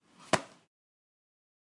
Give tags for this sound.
Fall Foley Hat

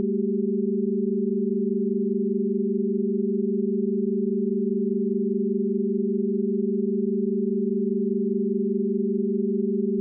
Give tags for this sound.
pythagorean test ratio chord signal

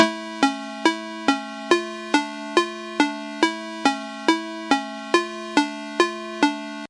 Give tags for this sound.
mojo-mills tone suspense phone mojomills jordan free ring-tone ring alert cell mills 3 cell-phone ring-alert mono 13

